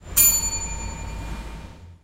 13 Campana timbre Hotel IPIALES2
field-recording, grabacion-de-campo, paisaje-sonoro, pasto-sounds, proyecto-SIAS-UAN, SIAS-UAN-project, sonidos-de-pasto, soundscape